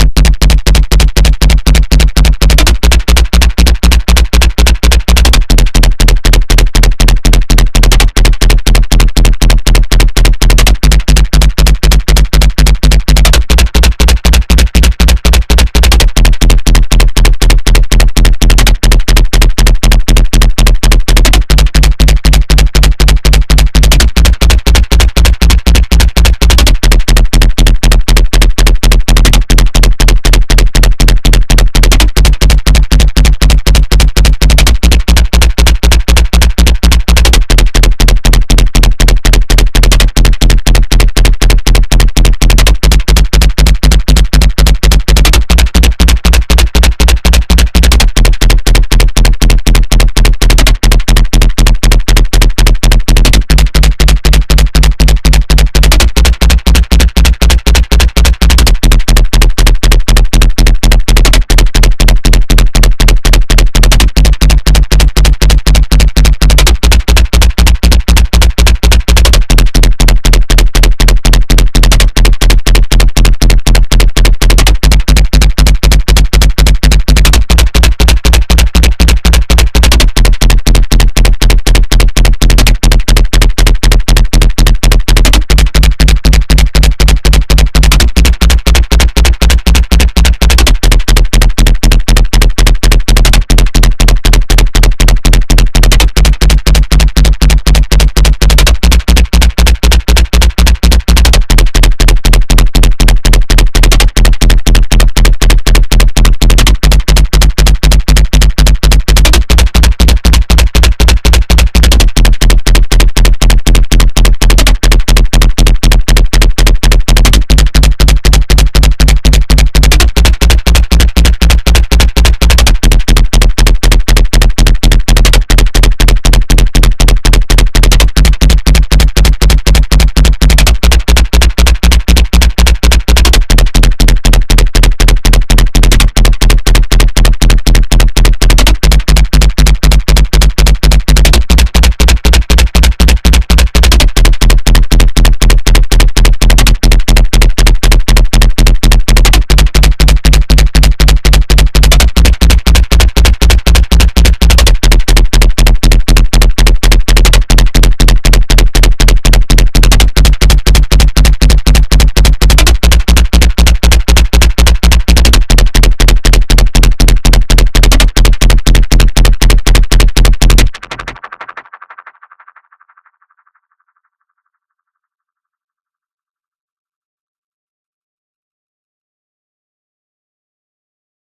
Dangerous Stab (Loop) made in Serum